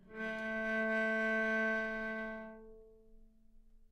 Cello - A3 - other
Part of the Good-sounds dataset of monophonic instrumental sounds.
instrument::cello
note::A
octave::3
midi note::45
good-sounds-id::417
dynamic_level::p
Recorded for experimental purposes
cello, multisample, single-note, neumann-U87, good-sounds